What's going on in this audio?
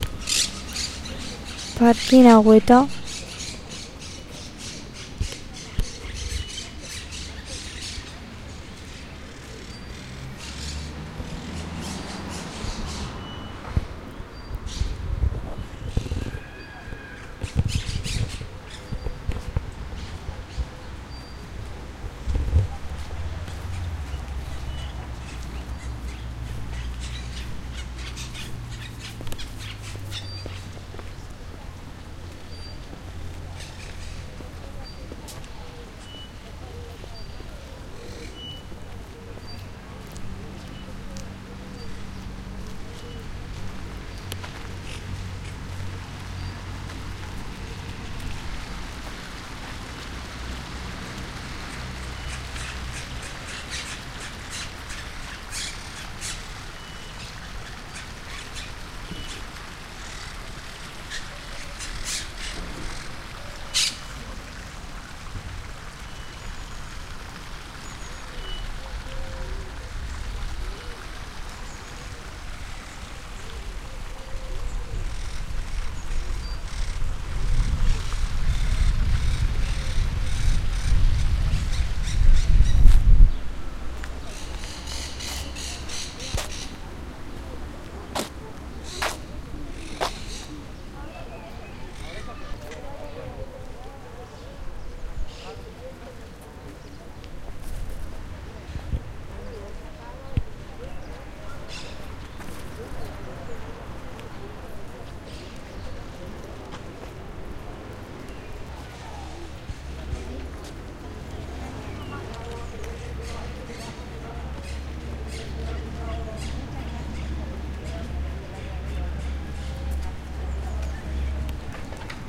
collab-20220426 ParcGuineuetaPlacaCentral Birds Water Dogs Kids Nice Complex
Urban Ambience Recording in collab with La Guineueta High School, Barcelona, April-May 2022. Using a Zoom H-1 Recorder.
Water, Kids, Dogs